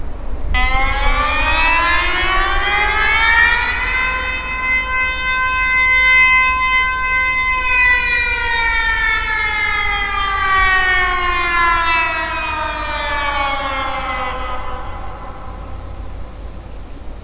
This is a short test sound from a public alarming system meant to warn for example a local disaster. The loudspeaker was located at roof of tall building. This recording was made about 0,3 km from that loudspeaker.